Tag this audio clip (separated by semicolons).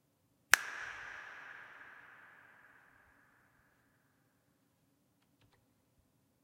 audio; compact; dreamlike; echo; effect; hands; impulse; ping; snap; sound; unearthly; weak